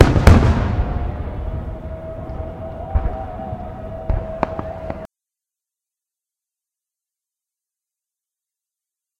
double hit distant ambience
recording of a double firework explosion
distant, hit, double, explosion, loud, fireworks, fire, outside, ambience